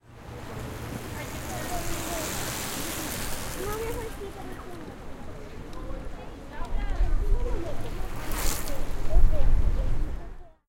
VELODROMO BOGOTA ciclas pasando varias velocidades 2
Proyecto SIAS-UAN, trabajo relacionado a la bicicleta como objeto sonoro en contexto de paisaje. Velódromo de Bogotá. Registros realizados por: Jorge Mario Díaz Matajira y Juan Fernando Parra el 6 de marzo de 2020, con grabadores zoom H6 y micrófonos de condensador
Bicicleta-sonora, bicycle-sounds, paisaje-sonoro, Proyecto-SIAS, soundscape